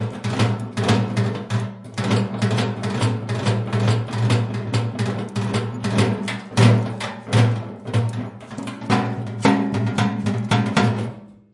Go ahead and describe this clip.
Metallic Banging
Bang, Boom, Crash, Friction, Hit, Impact, Metal, Plastic, Smash, Steel, Tool, Tools